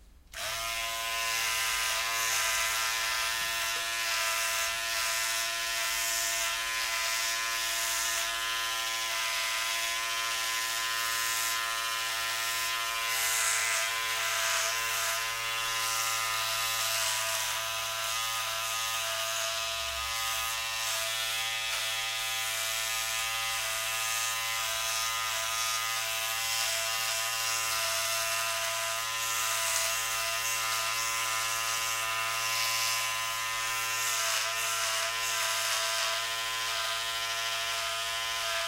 shaving with an electric Phillips machine. Rode NT4 > iRiver H120 /afeitandome con una maquina lectrica Phillips